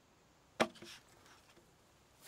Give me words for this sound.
Hitting Small Wooden Crate With Hand
Man knocking his hand off a small wooden box.
Hand, Crate, Hit